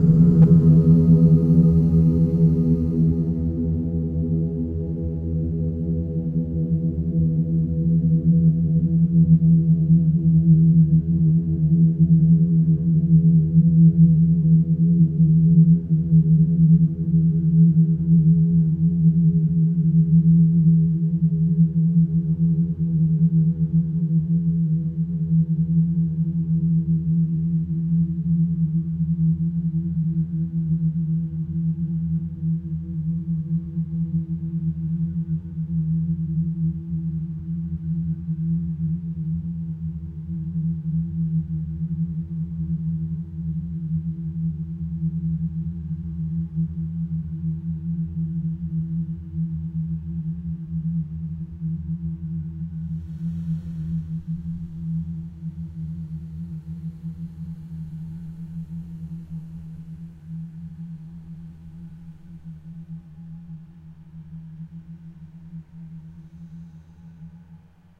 guitar open E Paulstretch
This is a recording of an low open E string on an acoustic guitar that has been paulstretched. I think it sounds really cool.
acoustic, E, guitar, moose-with-a-mic, paulstretch